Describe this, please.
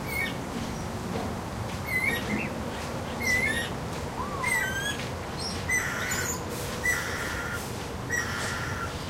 guira cuckoo01
Song of a Guira Cuckoo, with some other quiet bird calls. Recorded with a Zoom H2.
exotic, aviary, zoo, cuckoo, tropical, birds, field-recording, bird